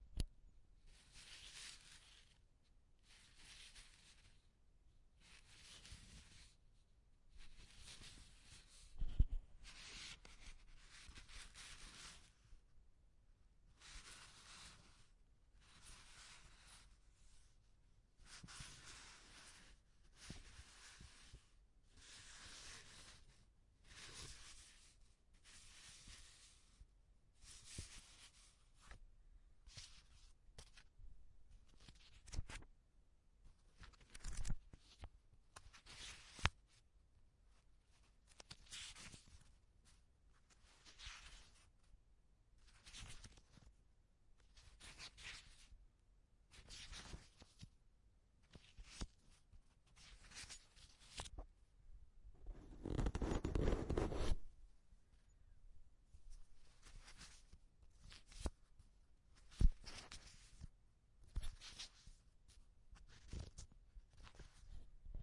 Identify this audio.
Paper Rubbing and Flipping
Sheets of paper sliding on each other. A few sounds of flipping through sheets of paper as well. Recorded on H1N Zoom
paper, paper-flip, paper-rub, paper-rubbing, paper-slide, paper-sliding, turning-pages